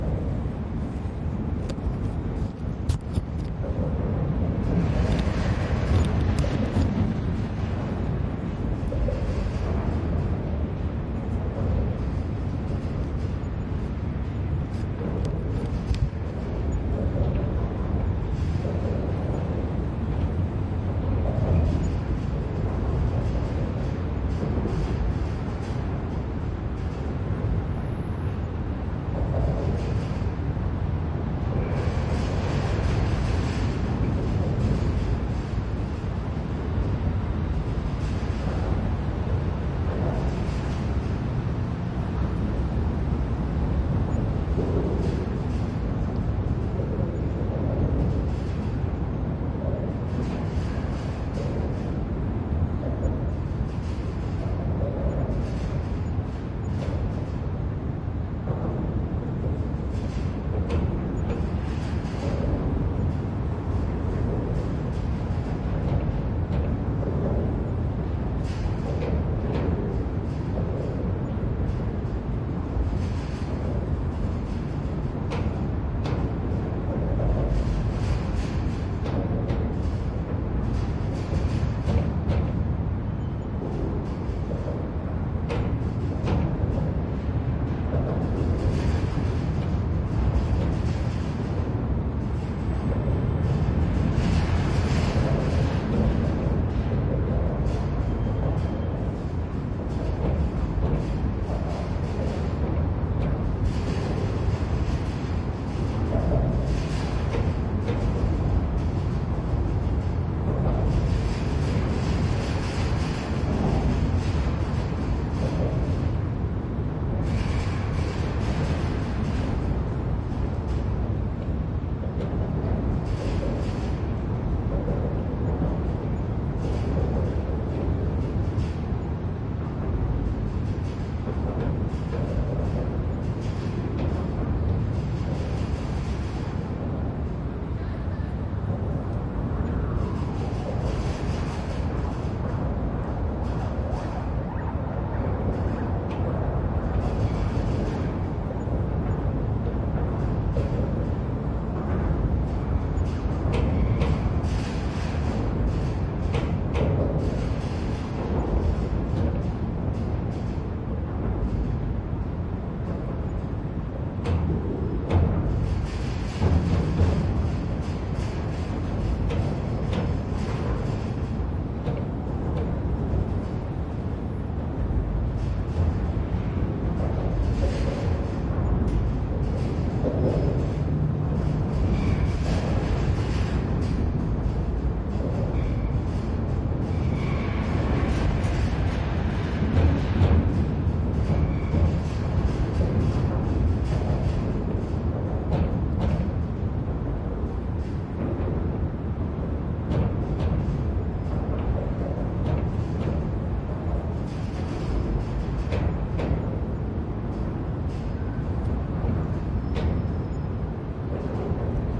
Content warning
Moscow Under the bridge/
atmosphere, city, traffic